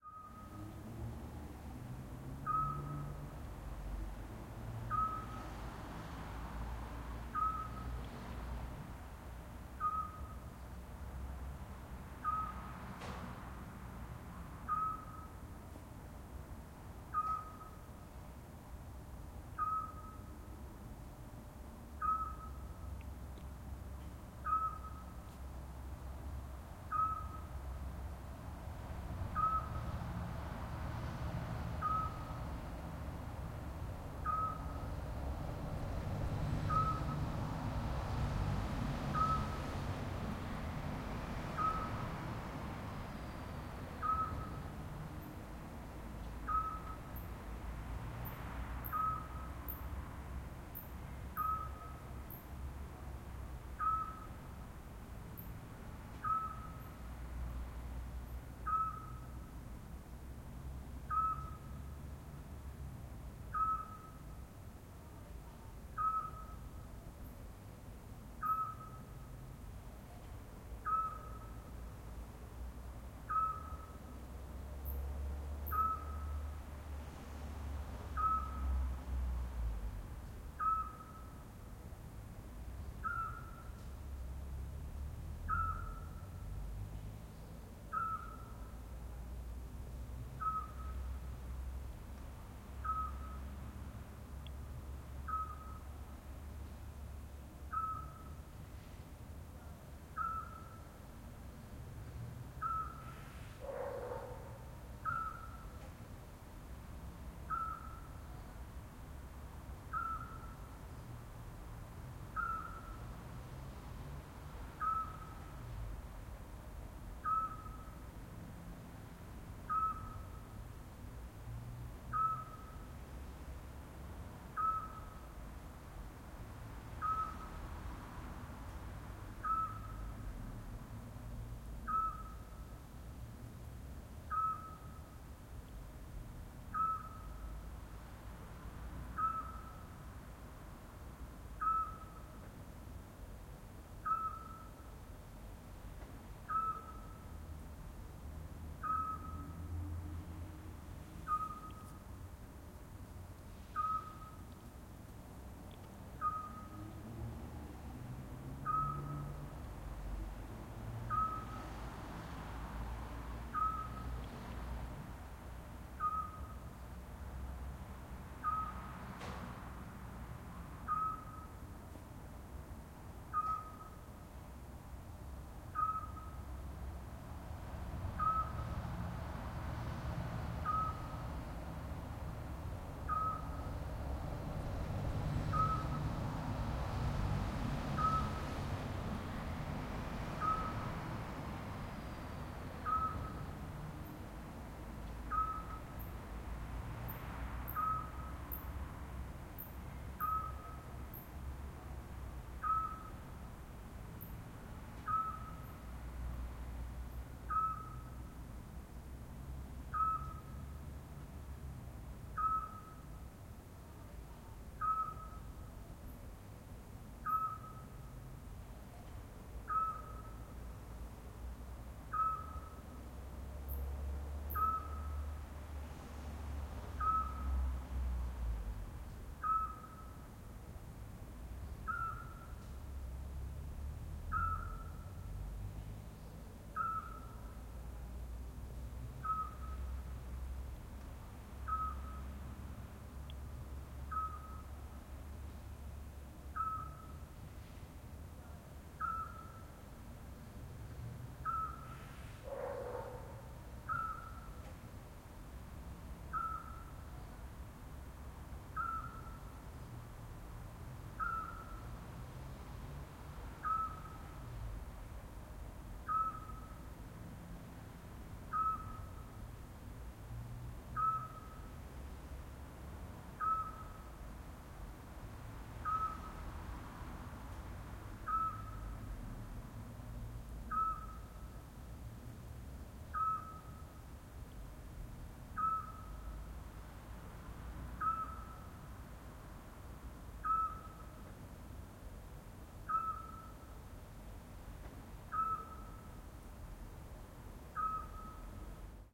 CUK NA BORONGAJU NOC SLAB SAOBRACAJ
Night ambiance with pygmy-owl (small owl), recorded at Borongaj, Zagreb, around 23:30h. Some traffic and some distant voices of people from buildings nearby.
borongaj, zagreb, pygmy-owl, croatia, small, traffic, night, ambience, atmosphere, field-recording, urban, city, ambiance, owl, soundscape